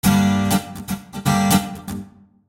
Rhythmguitar Gmin P114
Pure rhythmguitar acid-loop at 120 BPM
120-bpm, acid, guitar, loop, rhythm, rhythmguitar